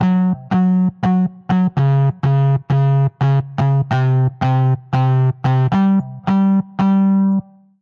Live BazzKlug Guitar 06
live, bass, guitars, distorted, grit, bitcrush, free